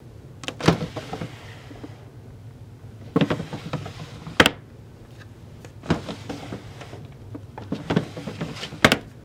opening a dresser drawer
drawer-open, dresser